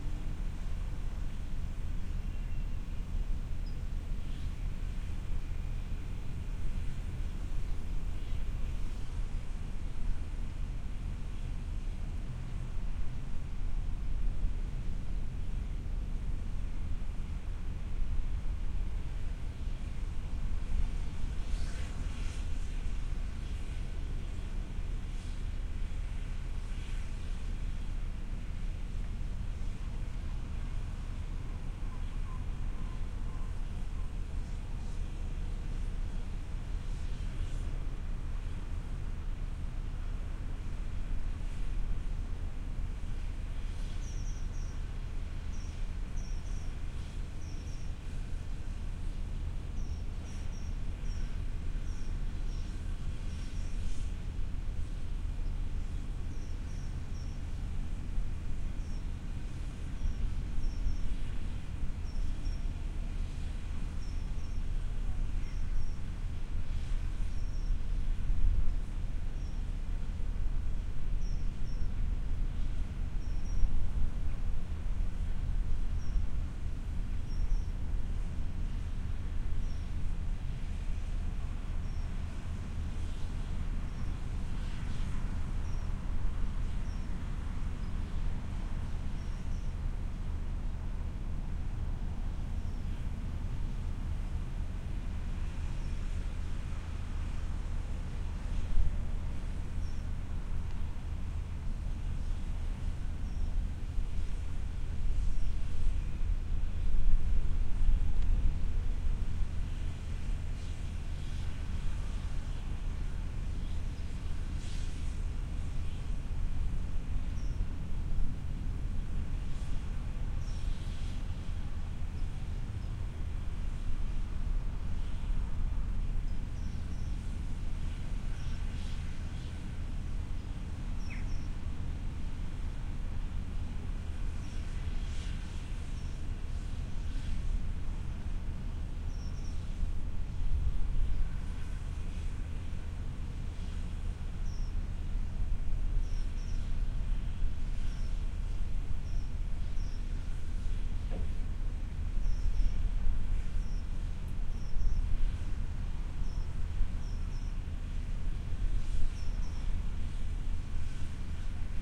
Another "Harbour sound", this time without any seagulls..so therefore it could be anywhere. Recorded in Ijmuiden / Netherlands, WL183 microphones, FEL preamp into R-09HR recorder.
field-recording, harbour, netherlands, sea, ships